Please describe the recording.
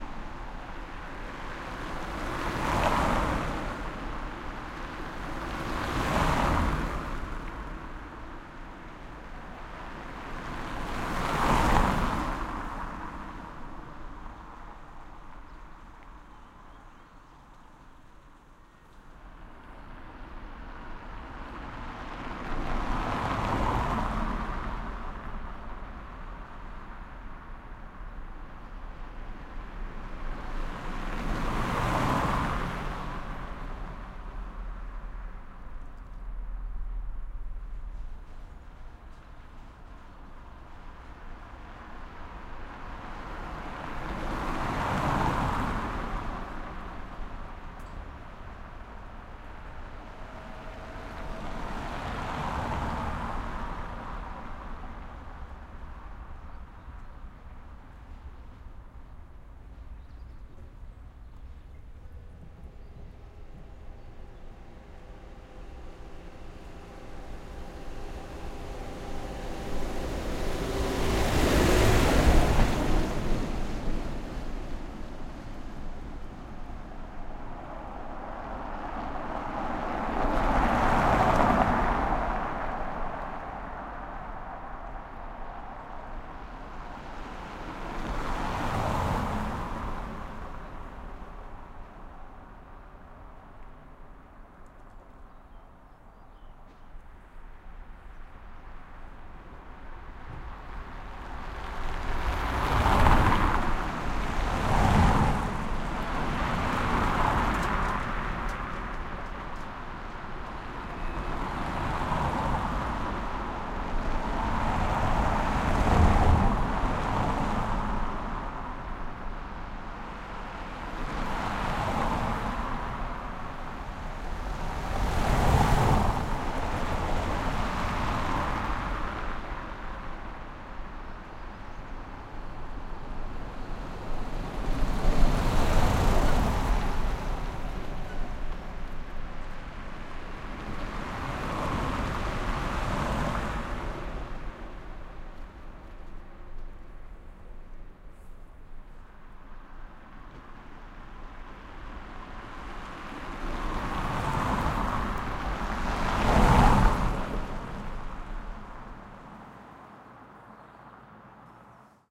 BG SaSc Car Passes Cobble Street Road Medium Speed Berlin
Car Passes Cobble Street Road Medium Speed Berlin
Berlin, Car, Cobble, Medium, Passes, Road, Speed, Street